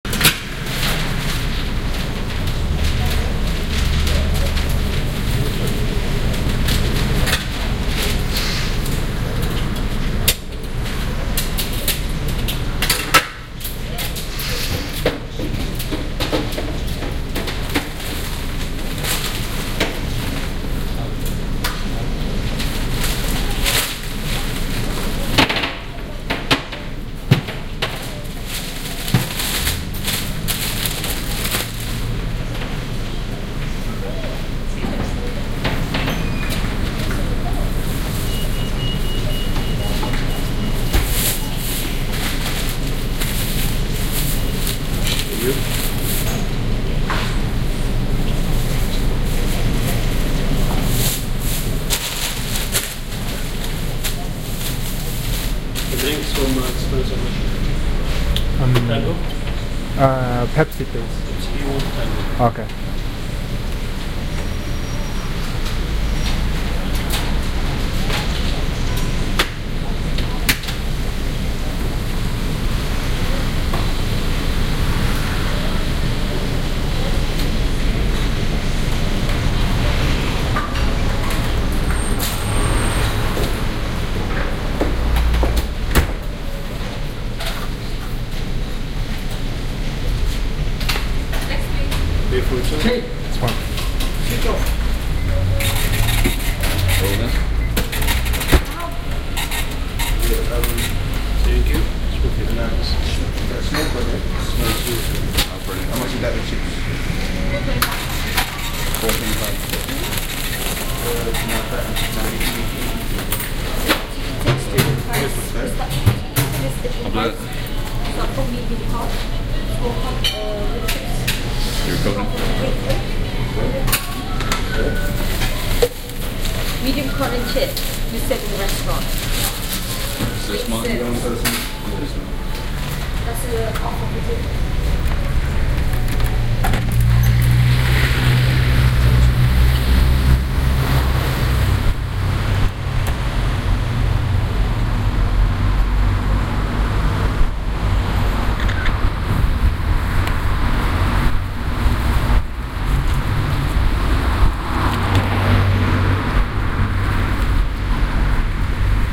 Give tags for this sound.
ambiance ambience ambient atmosphere background-sound city general-noise london soundscape

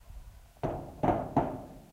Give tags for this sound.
Essen Germany School SonicSnaps

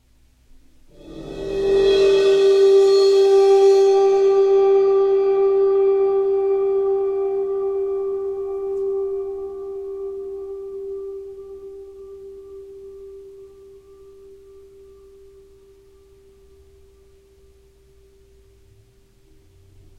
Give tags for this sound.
ambiance ambient atmosphere bowed-cymbal overtones soundscape

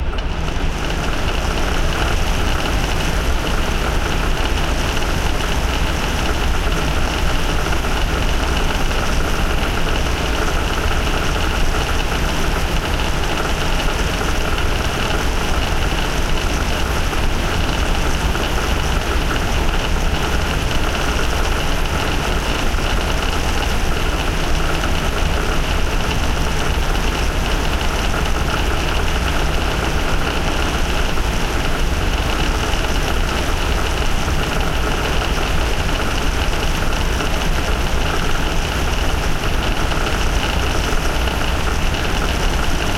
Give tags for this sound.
design field-recording morgado rework sound three tiago

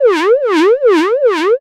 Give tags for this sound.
audio shoot gameaudio audacity alert game

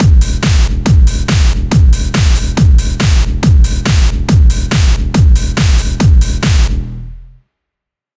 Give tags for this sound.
beat dark drum ebm electro industrial

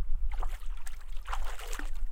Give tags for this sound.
splash splish water

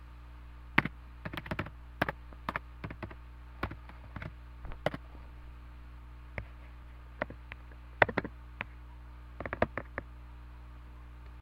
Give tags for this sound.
contact-mic experimental piezo

